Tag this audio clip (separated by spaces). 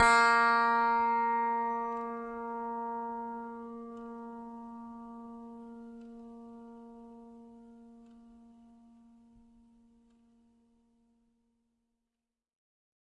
fingered multi piano strings